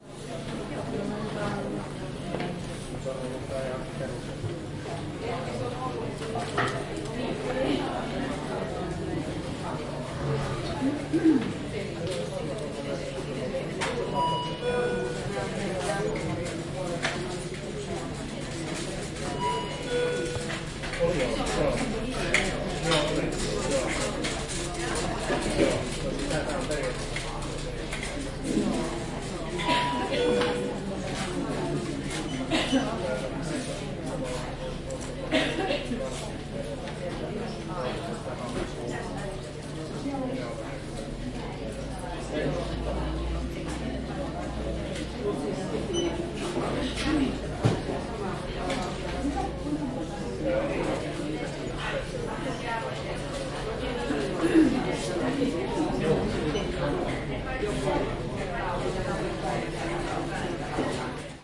Queuing in the drugstore. People are waiting and queueing number is beeping. Quiet chatter in the background. Recorded in Finland in 2017 with Zoom H2.